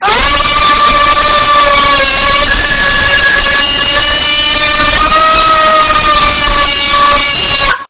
A woman screaming.
woman, female, pain, scream, 666moviescreams